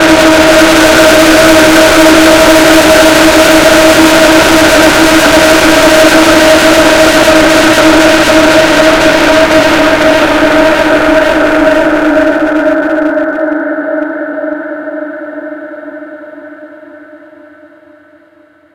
A Creepy Sound. Perfect for Horror Games. I Made it By Recording Myself Screaming, And then Paulstretching it Using Audacity.